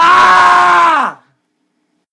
Young Male Scream
yell, scream, agony, 666moviescreams, shout, pain, human, male